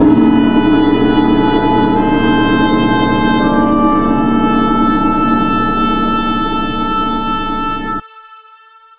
SemiQ intro 3
This sound is part of a mini pack sounds could be used for intros outros for you tube videos and other projects.
editing, EQing, sounds